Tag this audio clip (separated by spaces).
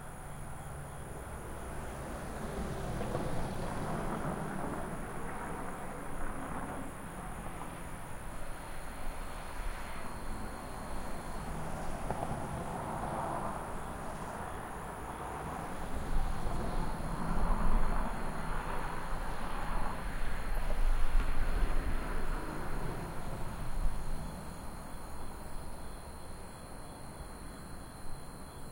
at822 austin bridge brush bugs cars crickets engine field-recording motor nture roads